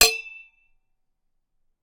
This is a metalic tink sound from something hitting a metal drink bottle. The sound rings out.
bottle, ding, dong, drink, hit, Metalic, tink